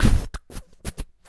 break clac loop
beat box break
beat, box, break, dare-19